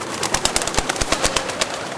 wing fluttering by a group of pigeons. recorded at Plaza de America, Seville / palomas aleteando